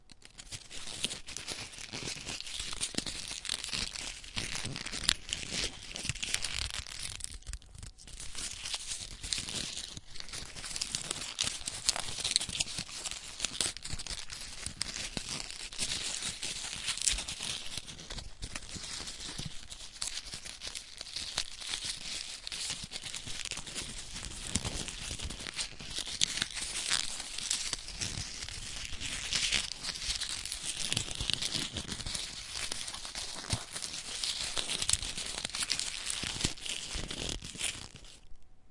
Paper Crumple
ZOOM H4 recording of paper (a receipt) being handled, moving around the microphone.
crumple, binaural